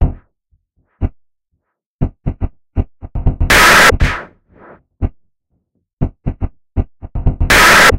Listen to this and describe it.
Massive Loop -11
A four bar electronic loop at 120 bpm created with the Massive ensemble within Reaktor 5 from Native Instruments. A loop with an experimental industrial feel. Normalised and mastered using several plugins within Cubase SX.
electro, loop, electronic, industrial, rhythmic, 120bpm